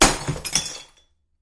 Glass Break SFX